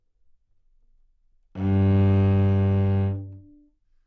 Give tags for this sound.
cello G2 good-sounds multisample neumann-U87 single-note

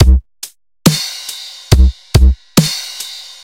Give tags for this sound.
snare Punchy drum Skrillex loop kick Accoustic 909 Tape Saturated combo

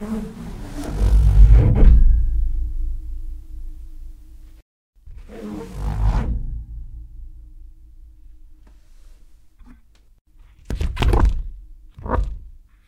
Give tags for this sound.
radiator pulling over balloon

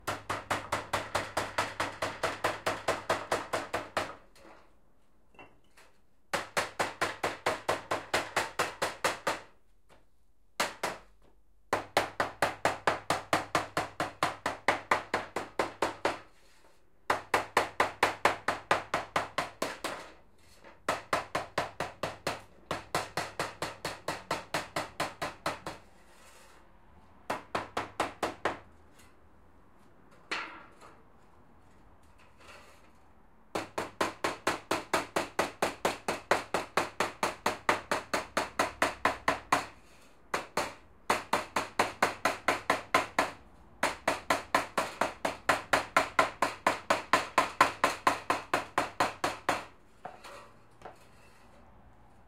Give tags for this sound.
builder clatter construction elector hammer knock metal metalworking noise rap repair rumble tap thumb

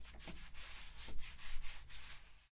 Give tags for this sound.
steps footsteps foots

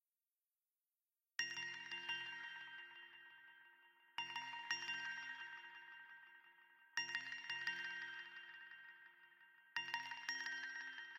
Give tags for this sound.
a layering tool ringing bells decent basically

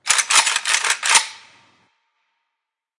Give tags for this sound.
AK Firearm Gun WASR

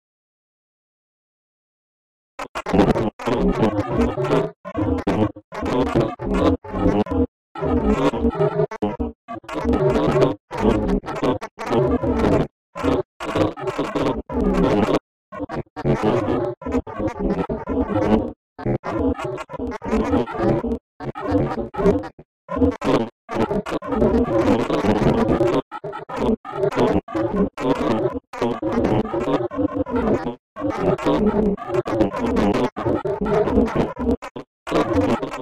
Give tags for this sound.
Weird Nonsense Sci-fi Alien-Species Crazy Alien Vocal Paranormal Strange Outer-Space Unusual

